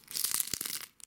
Made with and egg :D